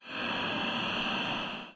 This is a recording of my friend exhaling that I put some effects on.
breath, human, processed